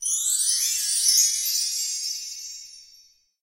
chimes 3sec gliss up
Rising glissando on LP double-row chime tree. Recorded in my closet on Yamaha AW16-G using a cheap Shure mic.